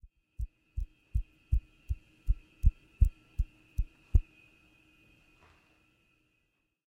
Me flicking my fingers close to the microphone.